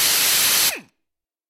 Impact wrench - Ingersoll Rand 5040t - Run
Ingersoll Rand 5040t impact wrench running freely.
2beat, 80bpm, air-pressure, crafts, impact-wrench, ingersoll-rand, labor, metalwork, motor, noise, one-shot, pneumatic, pneumatic-tools, tools, work